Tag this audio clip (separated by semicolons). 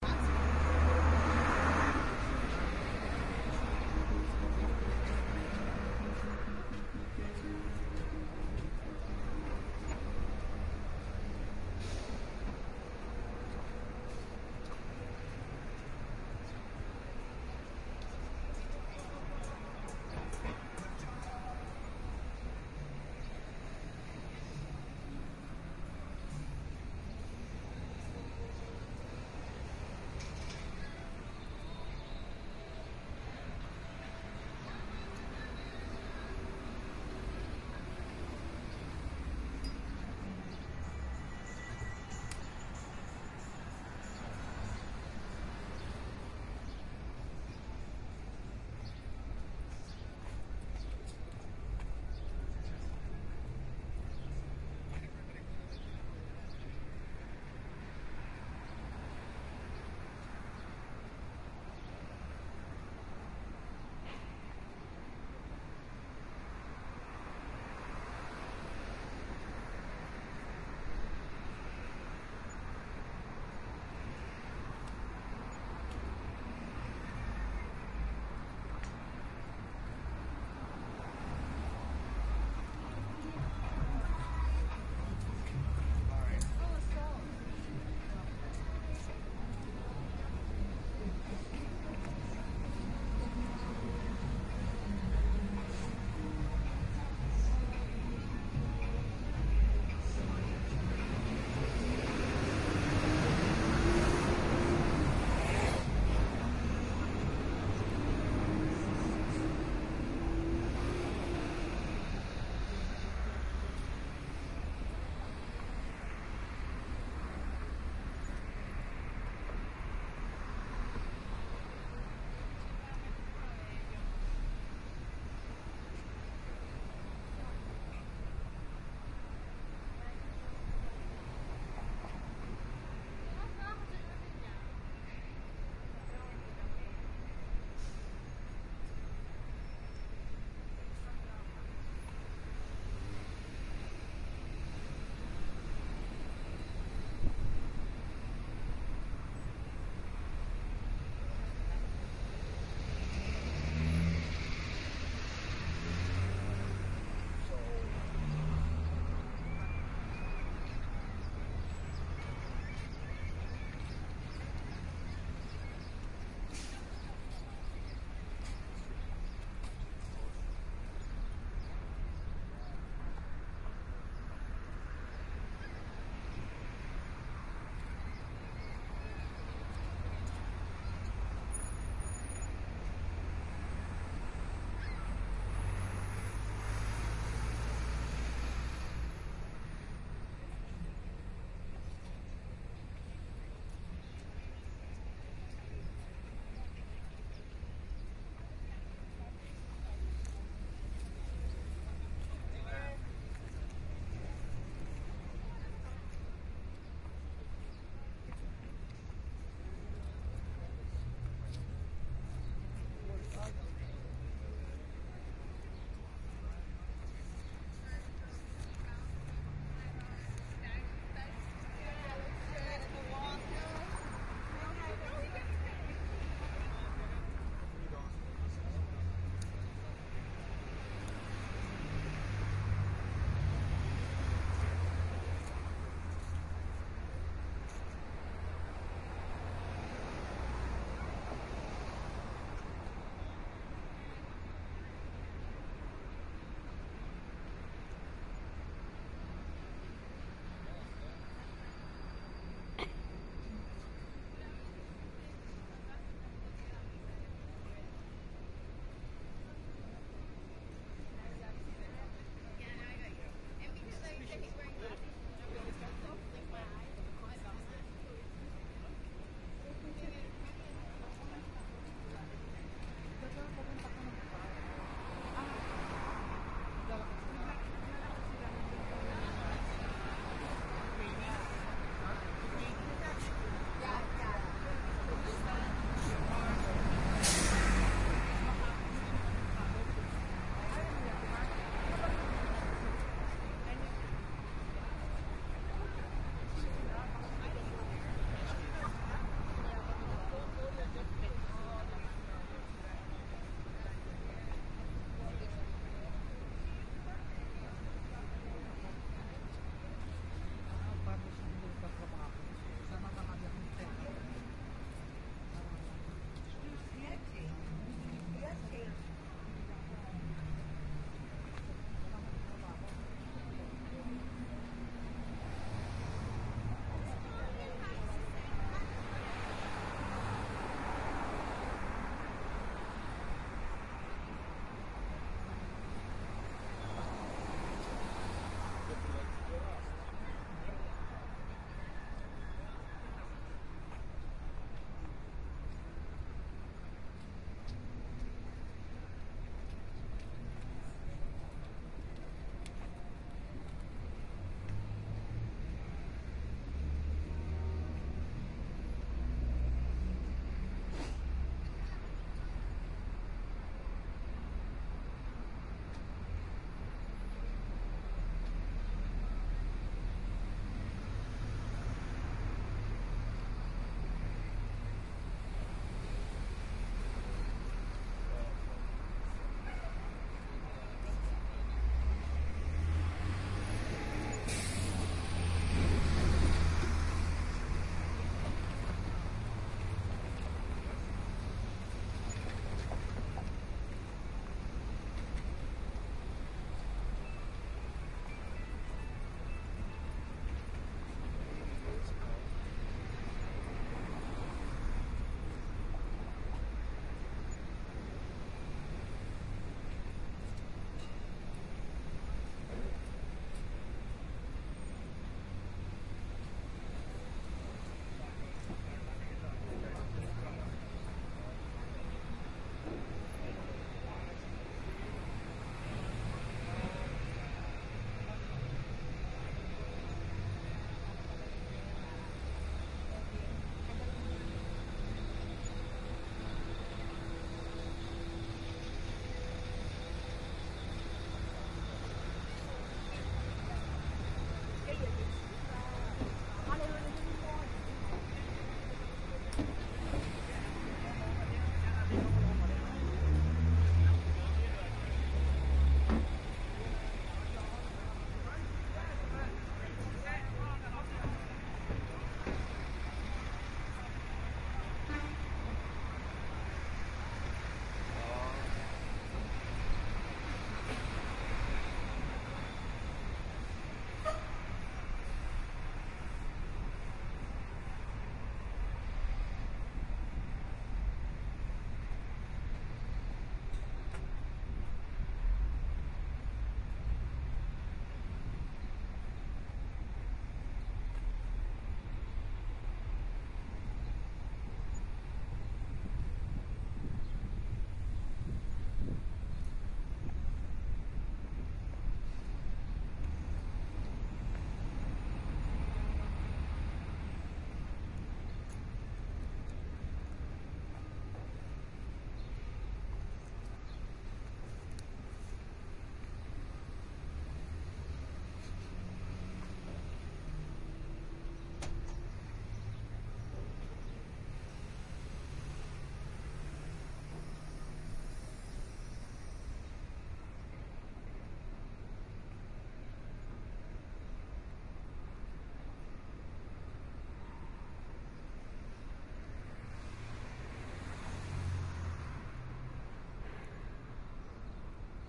stereo; atmosphere; recording; ambiance; ambience; binaural; walk; city; field; traffic